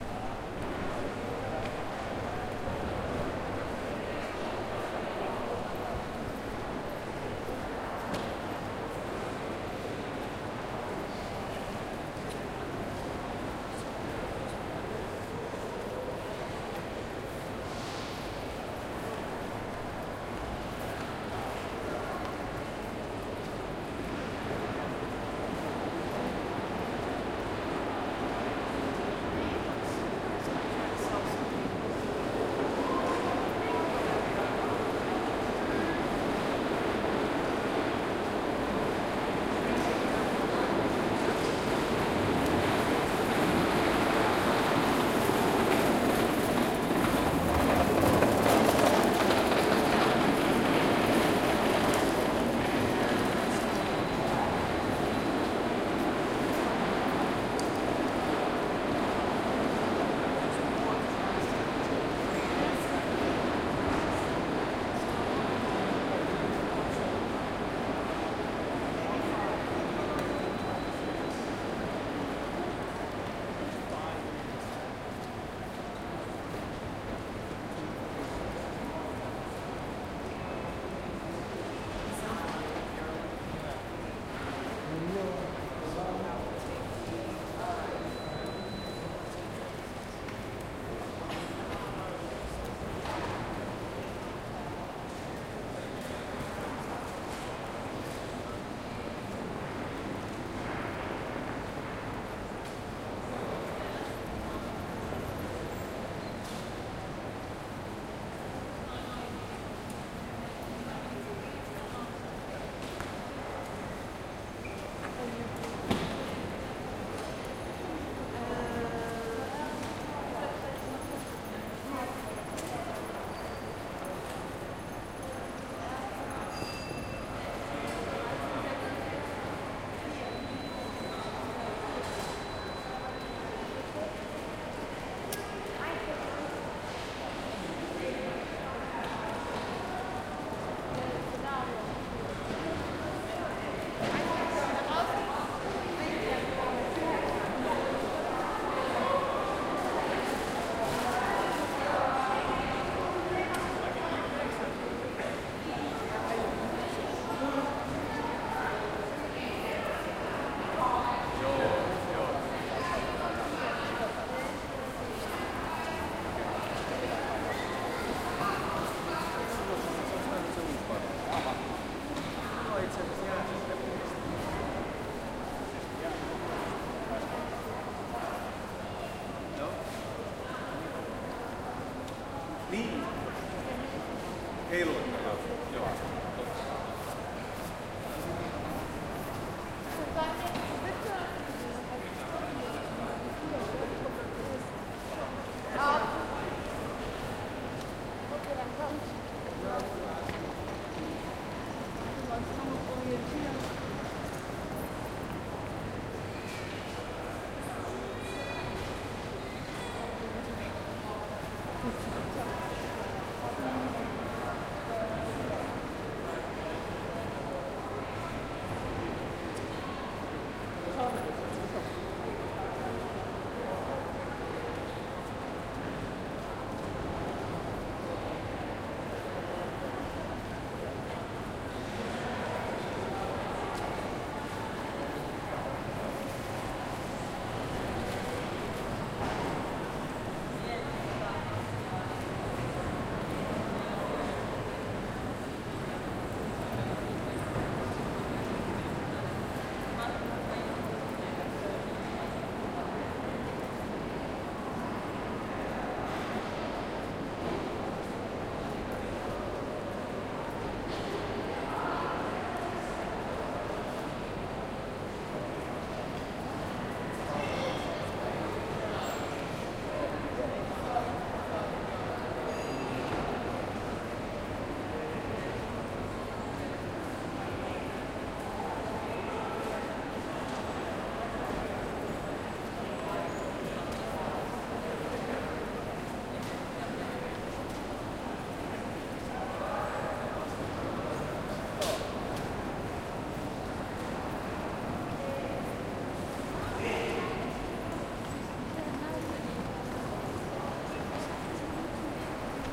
Helsinki railway station hall echo, distant chatter and faint announcements
Sound of the Helsinki railway station on a autumn day. People passing and distant announcements. Recorded with Tascam DR-40.
chatter, field-recording, finnish, helsinki, people, railway-station, trolley, walking